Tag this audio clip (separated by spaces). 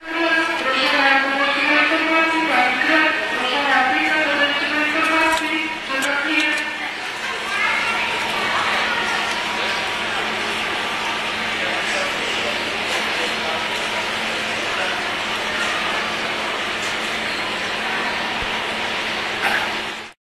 drone field-recording noise supermarket